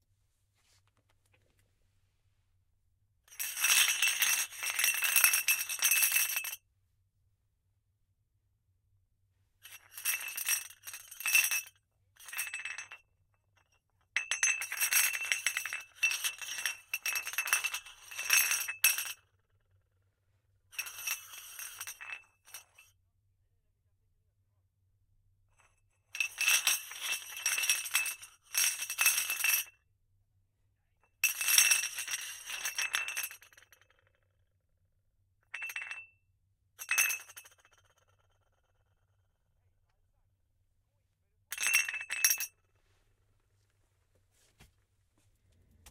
Rattling Crockery 1
Unprocessed recording of small plates rattling.
crockery, porcelain, glass, rattle, rattling, plates, cups